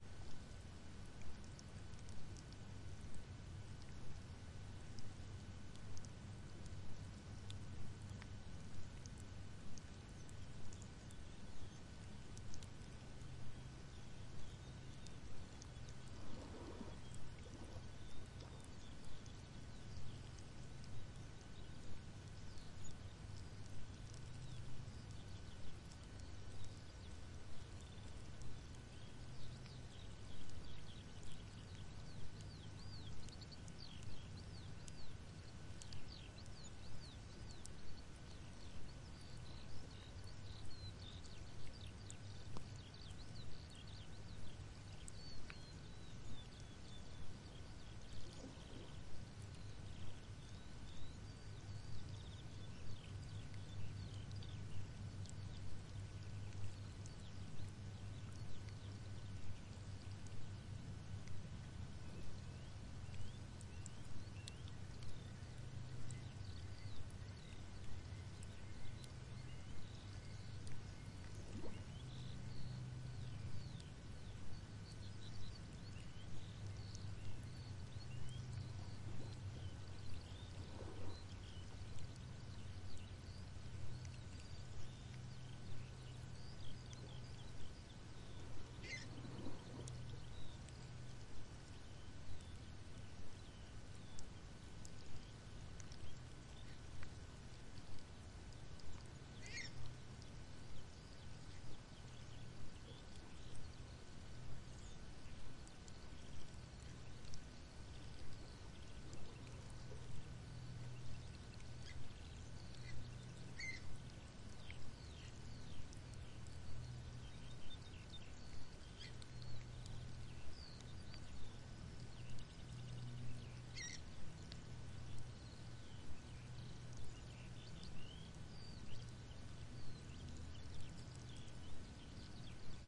Sizzling Seabed
Sizzling of the seabed at low tide. Continuous sizzling. In the background, occasionally: soft waves, distant seagulls. The sizzling is very soft, therefore the noisefloor of the converter is audible.
Recorded at the beach of Rotoroa Island, New Zealand, in September on a sunny day.
The sound was first of all recorded for reasons of fascination about the activity of the seabed.
Postprocessing: bell filter to soften the dominant high frequency areas of the noise floor.
sea, seabed, sea-shell, waves